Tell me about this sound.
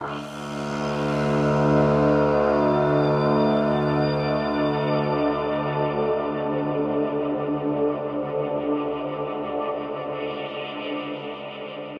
ab cello atmos
a layered cello with evolving pad
sound, drone